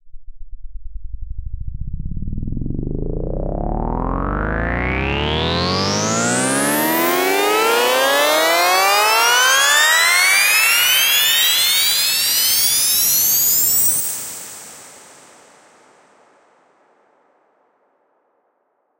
Riser made with Massive in Reaper. Eight bars long.